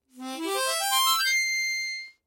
This is a recording I made during a practice session. Played on an M. Hohner Special 20.